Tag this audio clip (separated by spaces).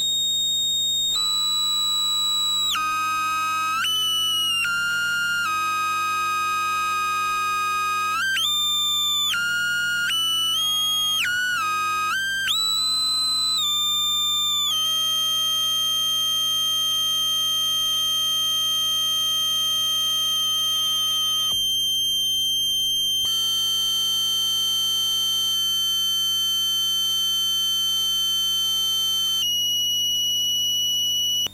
beeps harsh high-pitich irritating Mute-Synth-2 Mute-Synth-II